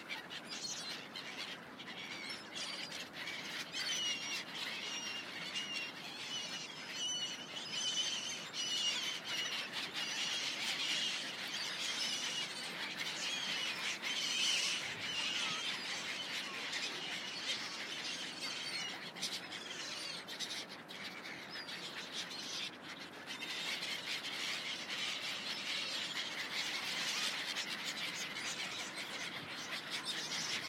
birds and ducks in a river
field, ambience, recording